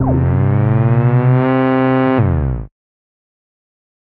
Alien Alarm: 110 BPM C2 note, strange sounding alarm. Absynth 5 sampled into Ableton, compression using PSP Compressor2 and PSP Warmer. Random presets, and very little other effects used, mostly so this sample can be re-sampled. Crazy sounds.
atmospheric, sci-fi, trance, pad, 110, glitch-hop, rave, processed, dance, synth, house, acid, effect, sound, bpm, bounce, electronic, porn-core, electro, hardcore, synthesizer, techno, resonance, dark, glitch, noise